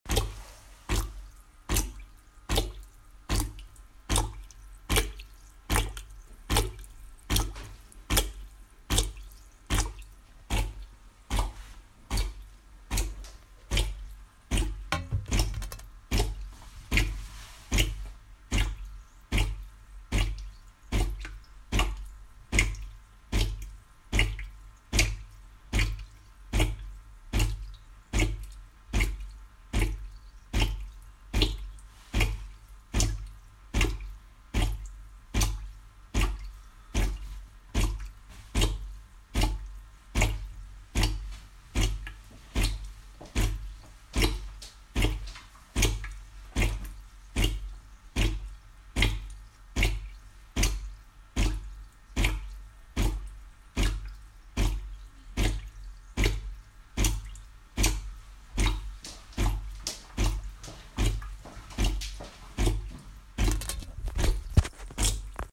Descaling Espresso Maker
descaling my espresso maker. Weird sound!
descaling, drip, drips, plop, rhythm, splash, water, watery, wet